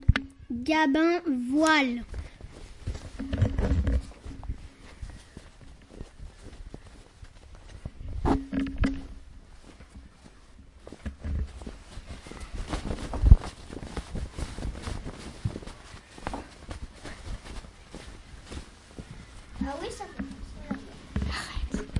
Sonicsnaps Elouan,Gabin,Yaël
france, saint-guinoux, sonicsnaps